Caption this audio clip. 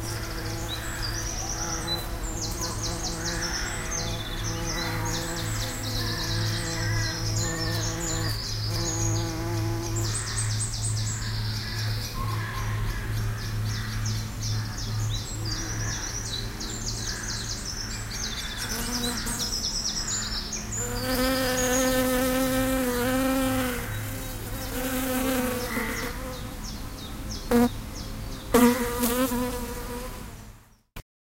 BirdsAnd Beees
Walking through my local woods , on the Lizard Peninsula, Cornwall, mainly birds with loud bees.
I had the Mic on a selfie stick and was holding it close to the bees, the birds were loud still